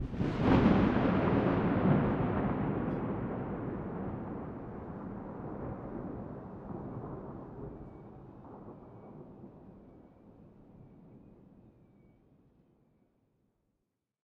balfron thunder D
Field-recording Thunder London England.
21st floor of balfron tower easter 2011